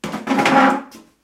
chaise glisse14
dragging a wood chair on a tiled kitchen floor